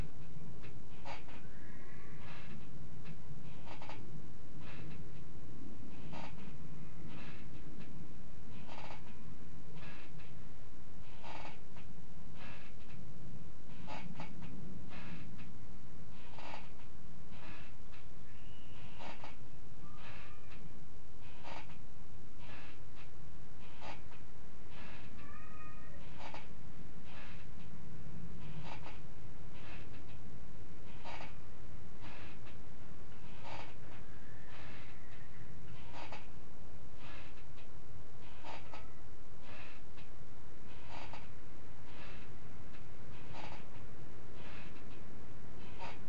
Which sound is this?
Needed a rocking chair with a slight breeze and captured it with subtle children at play and a jet plane in background.
rocking-chair, slight-breeze